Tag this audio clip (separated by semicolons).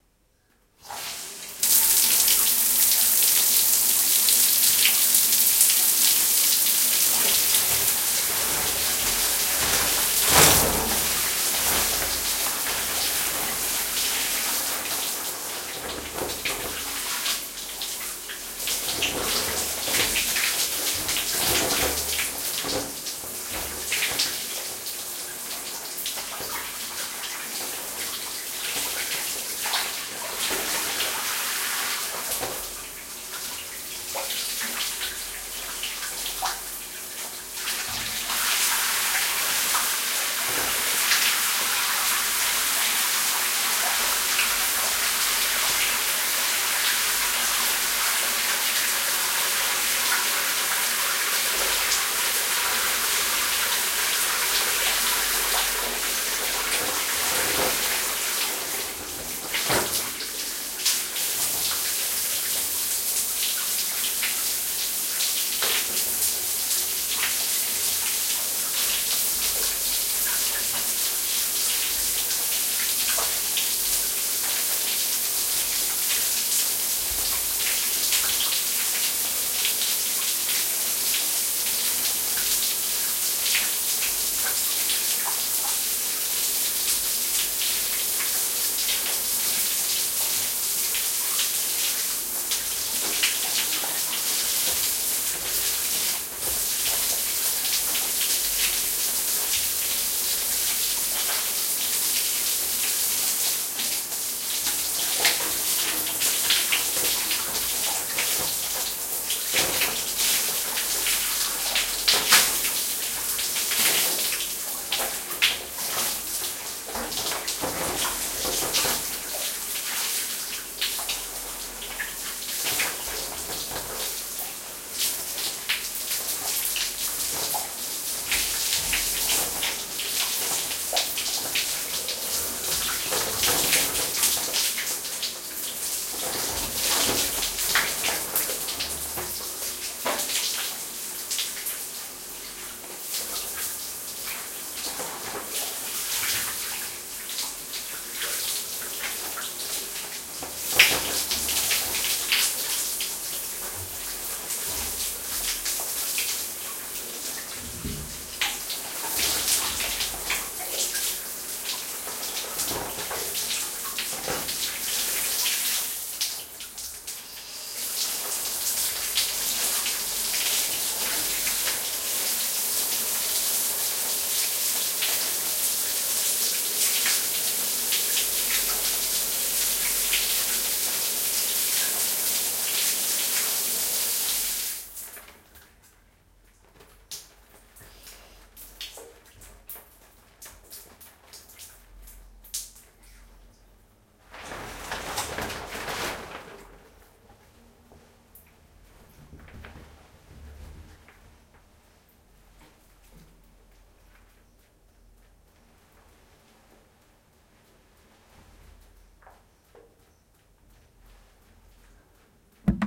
ambient; bath; bathroom; shower; stereo; water; wc